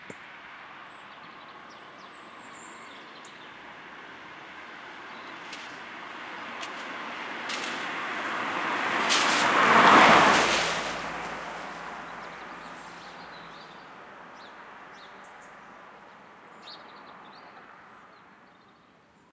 doppler coche
car, doppler, rain